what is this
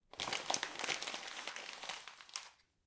Curling up a piece of wrapping paper, from a present. Zoom H2